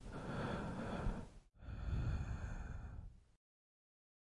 Shallow breathing for a character in a game